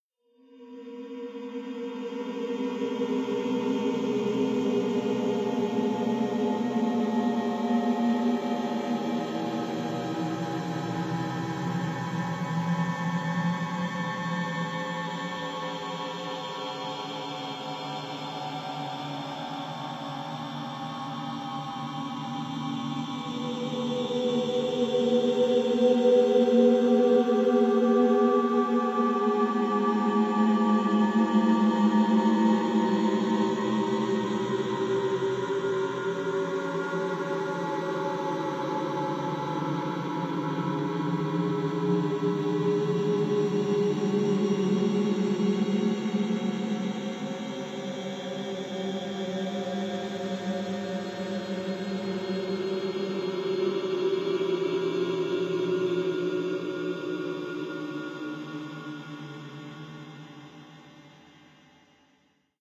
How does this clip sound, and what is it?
An evolving, mysterious drone perfect for sci-fi movies. Sample generated via computer synthesis.